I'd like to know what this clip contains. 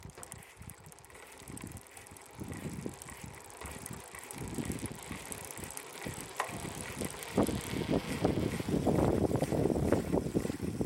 Bike On Concrete OS
Mountain-Bike Pedalling Concrete
Concrete, Pedalling, Mountain-Bike